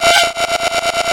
It's not much to say. I created a cosy call signal.
ring, Telephone, mobile